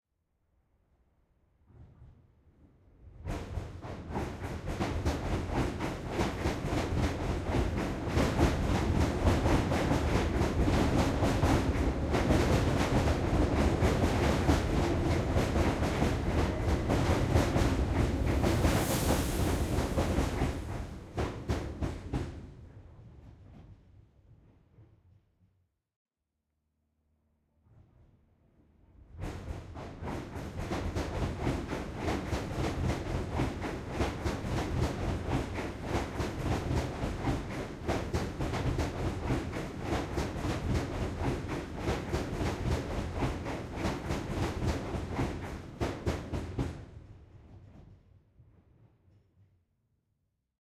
Underneath a railway bridge
Recorded underneath a metallic railway bridge in London using two spaced Sennheiser 6050 mics and a SoundDevices Mixpre3 Recorder.
atmosphere, atmo, bridge, ambience, loud, ambiance, train, terrifying, background-sound, background, bogey, haunted, atmos, metallic, railway